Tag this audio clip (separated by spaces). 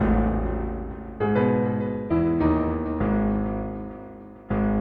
crushed digital dirty synth bit